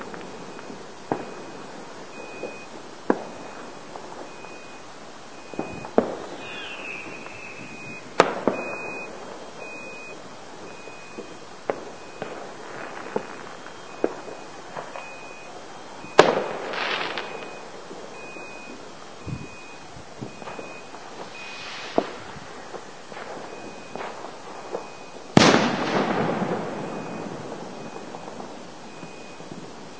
This clip has some crackly fireworks and one big one, you can hear it lift off at about 20 seconds and explode at 25 seconds.
For general details see Fireworks1 in this pack.
guy-fawkes-night, bang, fireworks-night, boom, ambience, firework, fireworks